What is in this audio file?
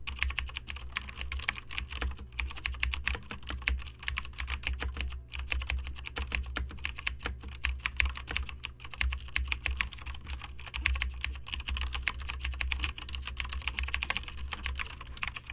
Mechanical keyboard typing
Keyboard, Mechanical, clicking, typing